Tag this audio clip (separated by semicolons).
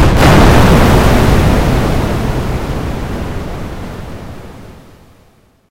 bomb; explosion; explode